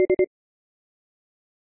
3 beeps. Model 1